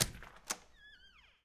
Open door with squeak
Recorded with a Sony PCM-D50.
Opening a door with a light squeak.
door, open, squeak, squeaky, wood, wooden